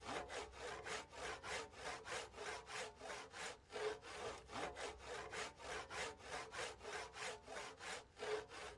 Saw cutting wood moderate
A saw cutting through a large piece of wood in a garden during the day. recorded using a ZOOM H6 portable digital recorder, rifle mic
cutting, garden, saw